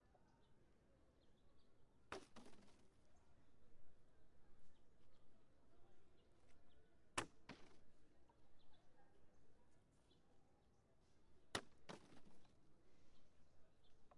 Uni Folie KickingPlasticBottleInPublic
Kicking a plastic bottle around.
plastic, kicking, around, bottle